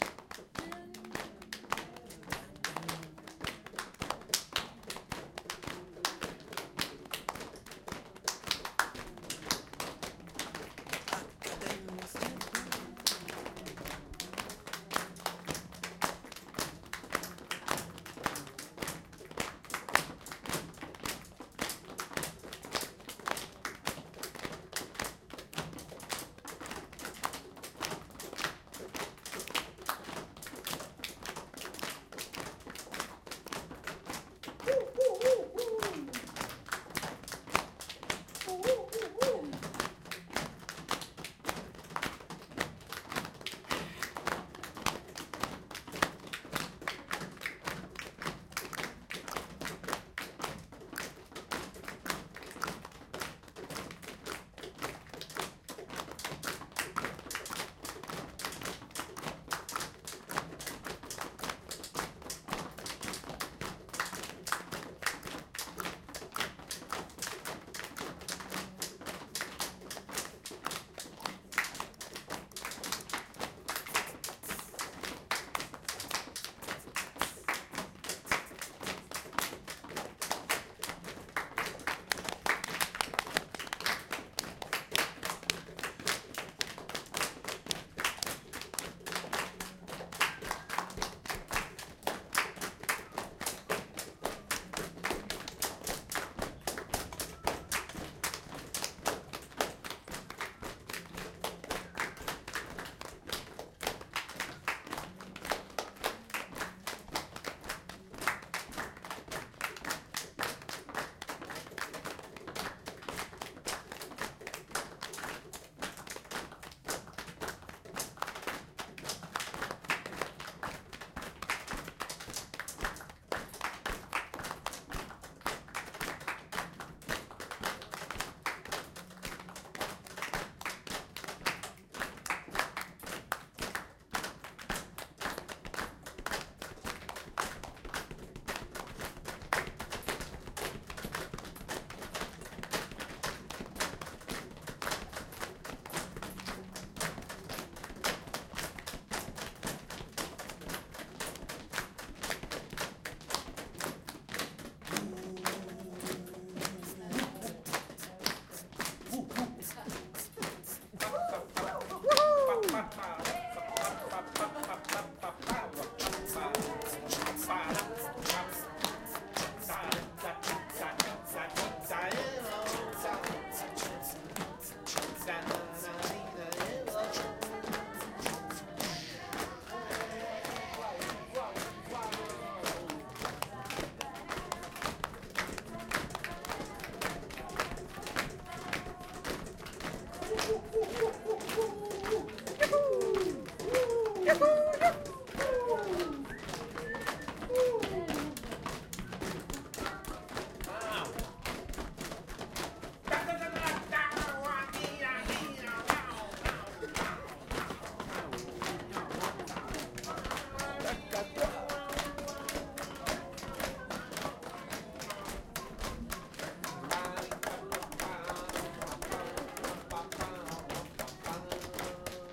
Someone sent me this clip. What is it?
body rhythm
People clapping, slamming on knees and stamping simple rhythm. Also singing and shouting. Recorded during a creative workshop on Akadem 2012, Ruzomberok, Catholic university. Well, at the end it sounds like a real jungle. Recorded with Zoom H1 internal mic.
body, clapping, creativity, jungle, performance, rhythm, singing, slamming, strange